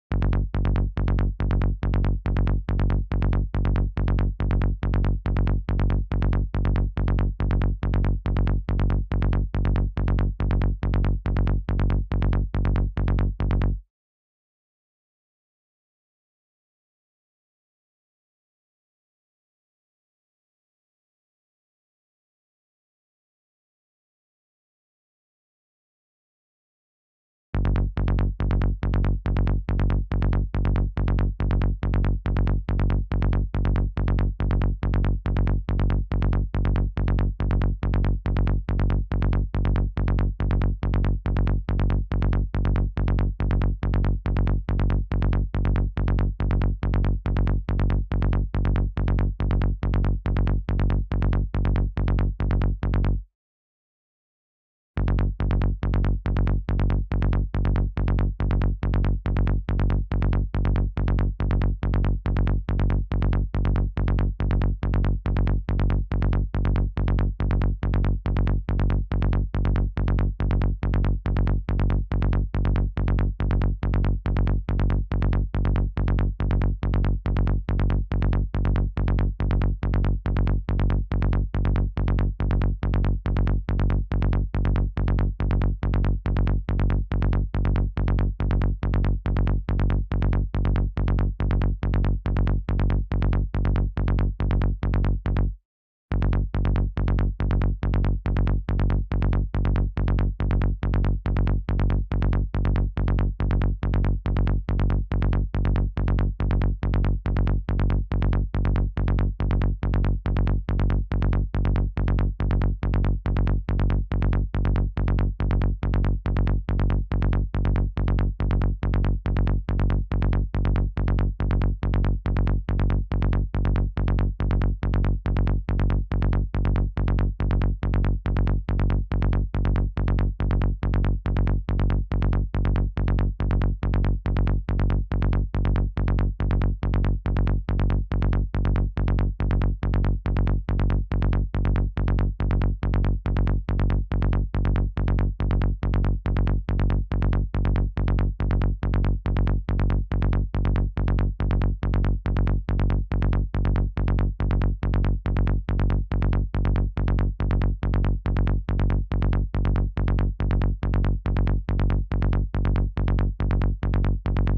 Fractal Tribe - 3-Serum x64
Rolling psytrance bassline in the key of G designed by myself with Serum Vst in Ableton for a track never released.
want that pro bass sound?